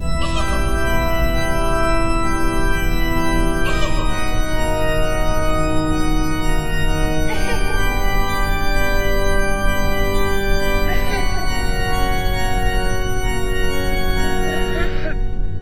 A little melody I made using FL and "Church Organ 2nd" VST, also added giggle by RaspberryTickle and creepy ambient sound by OllieOllie. For plain organ send me a pm.
I hope this was usefull.
haunted, scary, ghost, fear, spectre, nightmare, creepy, spooky, thrill